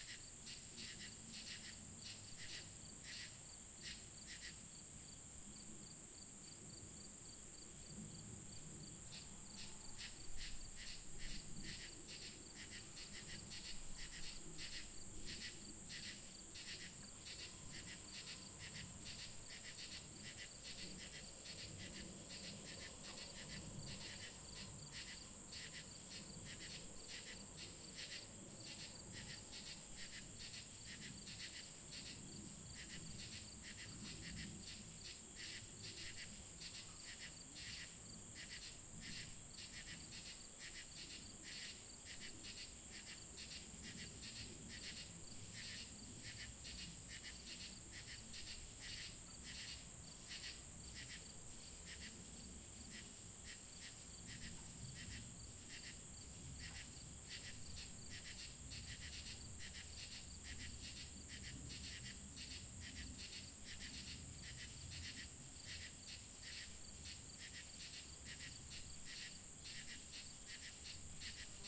Wilderness Loop
This is the sound of two crickets giving a concert in a secluded area. There is also the sound of a large truck operating to your left. Loopable, as far as I know.
ambience, crickets, field-recording, wild, wilder, wilderness, wildest